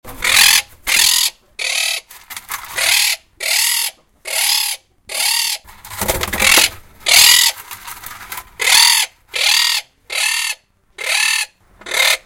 Angry bird screaming
there is a few seconds of a bird screaming
birds, screaming, bird, loud, panska, czech, spsst